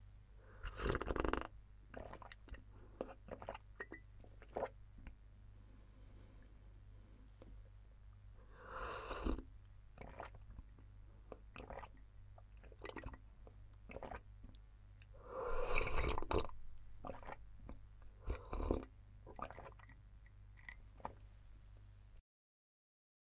Drinking from a mug
Drinking liquid from a mug. Recorded with AT 2035.